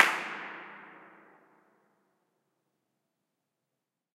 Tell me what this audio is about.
Tunnel 3 Impulse-Response reverb high-pitched clap
Tunnel, high-pitched, Impulse-Response, reverb, clap, 3